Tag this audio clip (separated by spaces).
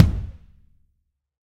bass drum kick processed real sample